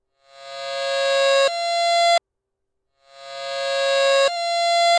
My cheesy front door chime sample reversed
reversed, 1